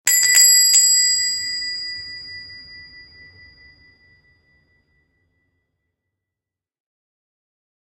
shop door bell
The Sound of a bell on a shop door as someone enters the shop.
door, shop